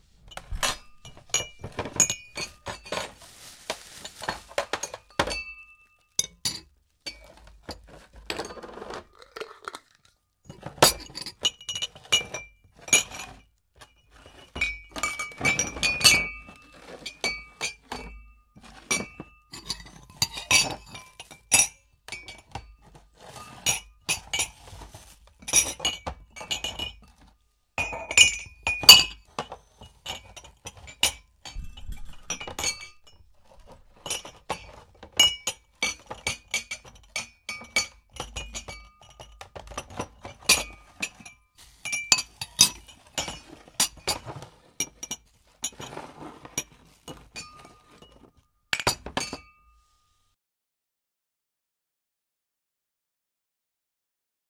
Glasses Kitchen IO
A kitchen kind of sound i made by putting some cups, glasses and other stuff onto a table and then randomly pushed them against each other.
ambience, athmo, cups, glass, glasses, home, kitchen